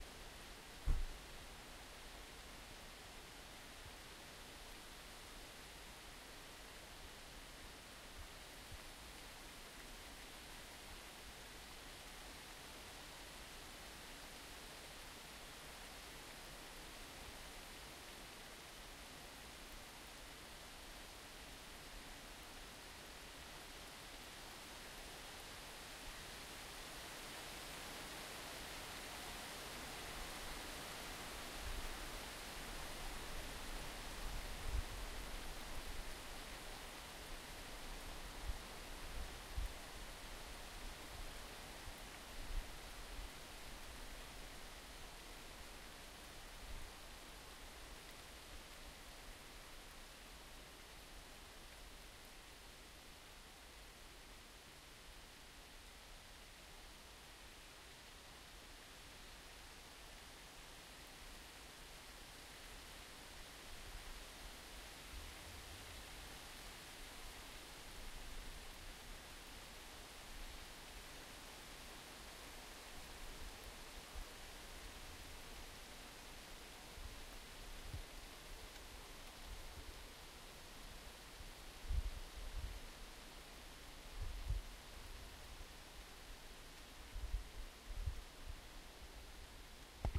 Leaves in wind
Leaves on a tree blowing in the wind.
Field recording recorded with Zoom H1
field, forest, leaves, recording, tree, wind